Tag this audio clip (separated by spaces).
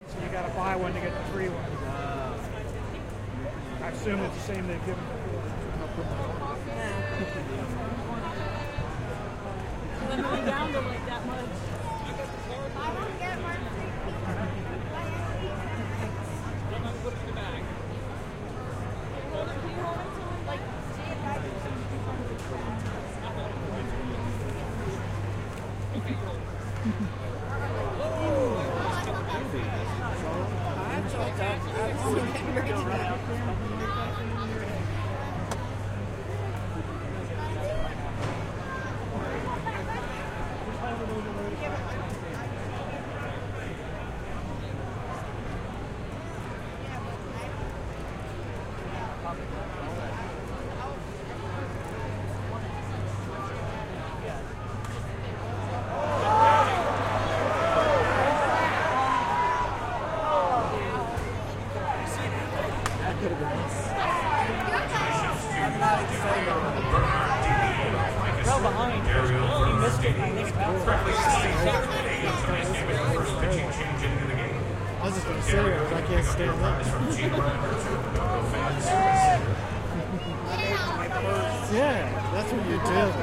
crowd minor league ambience field-recording baseball